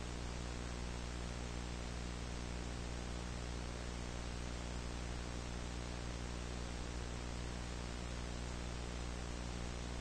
vhs hum
This is a sound effect I generated that simulates the background noise of a vhs tape from ca. 1991. It is basically pink noise at approx. 0.01 dBs and sawtooth wave at 60 hz, both combined with Audacity. It is ideal for adding to video projects on i.e.: Sony Vegas to recreate the effect of VHS. Enjoy this sound effect.
1970s, 1980s, 1990s, 2000s, analog, classic, old, retro, tape, vhs, vintage